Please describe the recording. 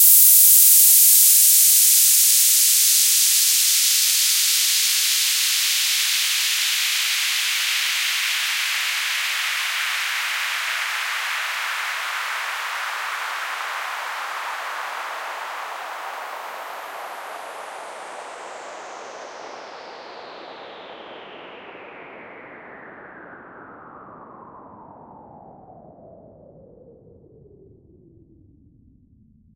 Lunar Downlifter FX 1
For house, electro, trance and many many more!
lunar, fx, downlifter